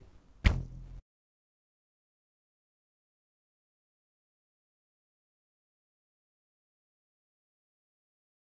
OWI Bow string thwang
string, bow, weapon